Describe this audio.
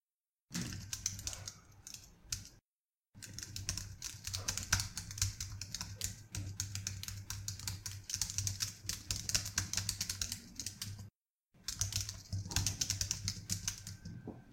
dh keyboard collection
I spammed buttons on my keyboard in front of my microphone, I don't type that fast 😛
typing, laptop, keys, type, keyboard